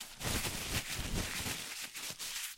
Tissue paper being scrunched up into a ball.